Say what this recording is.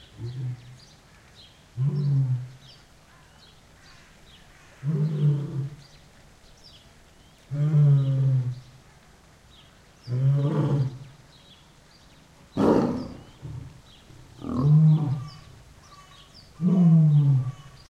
Lions two
Two lions "play" together and bellow.
animal, bellow, field-recording, lion, lions, roar